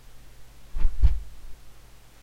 Some fight sounds I made...
leg, combat, hit, fight, punch, fist, fighting, kick